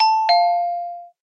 Door bell sound
I Made this for a game must be used in games and other projects
Made with Minecraft Note Block Studio as i told you i don't have a sound recorder
Games, Games-where-you-have-to-enter-a-house